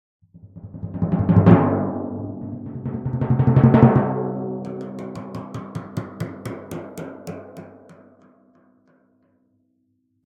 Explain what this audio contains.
Success! Quest Complete! (RPG Sound)
Supposed to be a success notification in a role playing video game.
Based on
adventure, battle, chant, complete, completed, completion, danger, drum, drums, fanfare, game, level-up, levelup, notification, quest, role-playing-game, rpg, success, video-game, war, warning